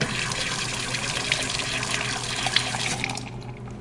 Bathroom Handwash
toilet, flush, wc